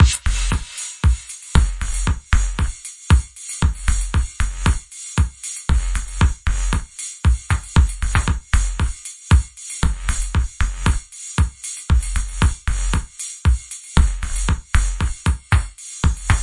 reverb short house beat 116bpm with-05
reverb short house beat 116bpm